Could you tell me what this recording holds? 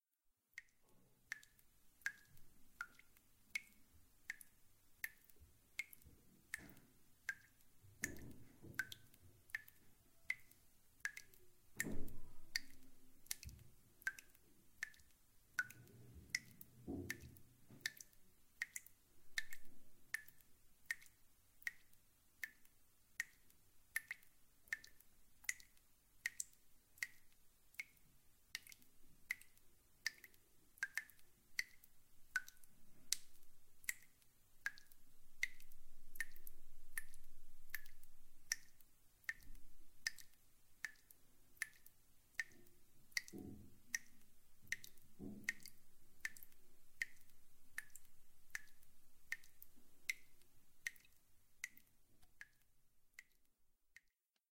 Water drops in a sink
House, Drops, Water